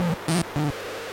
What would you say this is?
Elek Perc Loop 003 Var10
Unpreocessed rhythmic loop from my Mute-Synth-2. Simply cut and trim in Audacity after recording straight into the laptop mic in put.
loopable, seamless-loop, Mute-Synth-2, Mute-Synth-II, rhythm, percussion